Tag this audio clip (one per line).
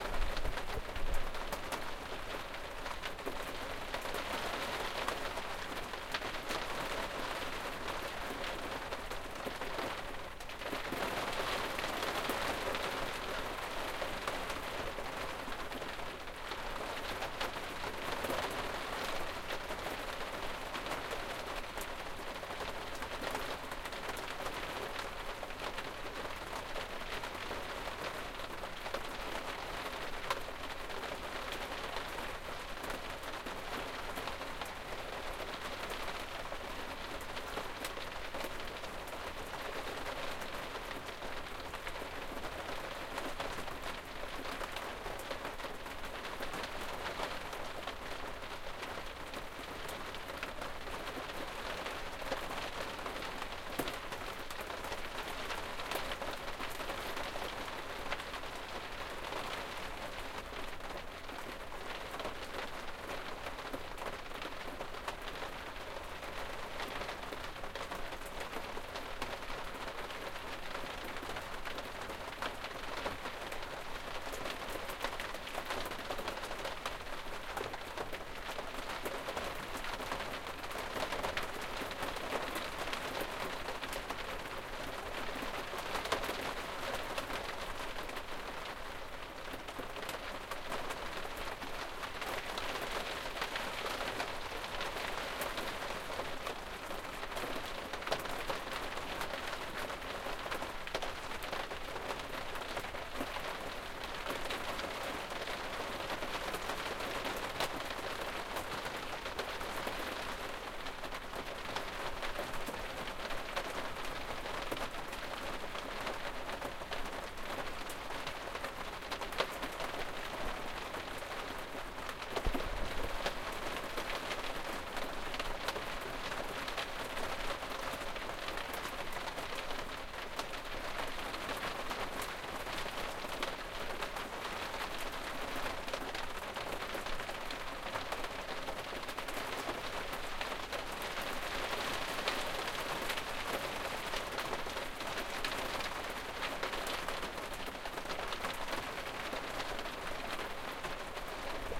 Raining
Raining-inside-a-motorhome-ullswater
Ullswater
Rain
Lake-District